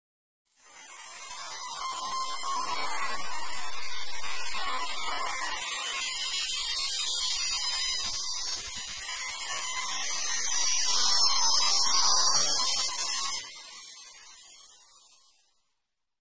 Trip.15 Birds
remembering a Trip with “DONPEDRO”, at some great landscapes at Catamarca. Re-Sample of File=44289. Using SoundForge Process, Effects, tools in a RANDOM WAY, Just doing some “Makeup” at them